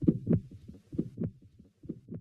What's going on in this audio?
heart-2 remix
Hearts, table, finger, my